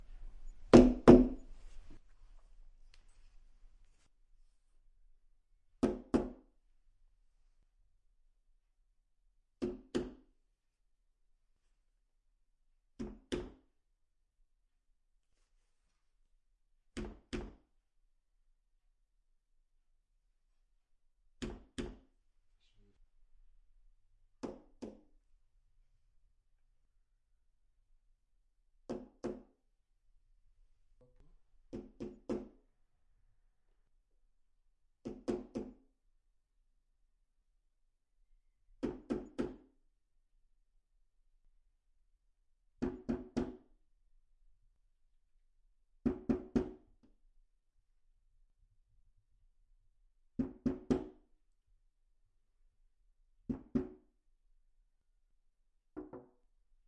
013-Door window knockings from house
house, knocking, Window